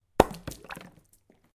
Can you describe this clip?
Splash (high fall)
Dropping a rock in water from a high fall